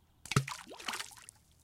Stone produced splashing sound recorded with zoom H4n
field-recordings, splash, stone, water, watersplash